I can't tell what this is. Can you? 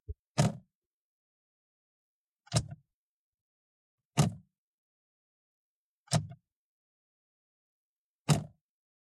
renault kangoo 1.6 16v foley turn signals lever mono NTG3
This sound effect was recorded with high quality sound equipment and comes from a sound library called Renault Kangoo 1.6 16V which is pack of 227 high quality audio files with a total length of 142 minutes. In this library you'll find various engine sounds recorded onboard and from exterior perspectives, along with foley and other sound effects.
16v, automobile, button, car, click, engine, foley, gas, gui, kangoo, lever, renault, signal, signals, turn, vehicle